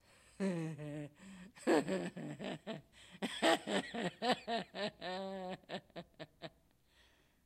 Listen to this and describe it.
psycho laugh 1
Woman laughs in a state of delusion or psychosis
Sony ECM-99 stereo microphone to SonyMD (MZ-N707)